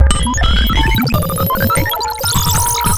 firstly i've created a few selfmade patcheswith a couple of free virtual analog vsti (synth1 and crystal, mostly)to produce some classic analog computing sounds then i processed all with some cool digital fx (like cyclotron, heizenbox, transverb, etc.)the result is a sort of "clash" between analog and digital computing sounds
analog, beep, bleep, commnication, computer, computing, digital, effect, film, future, fx, lab, movie, oldschool, retro, sci-fi, scoring, signal, soundeffect, soundesign, soundtrack, space, synth, synthesizer, vintage